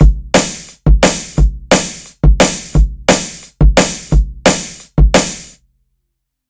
semiQ dnb dr 004
This is part of a dnb drums mini pack all drums have been processed and will suite different syles of his genre.
jungle, techstep, beats, dnb, drums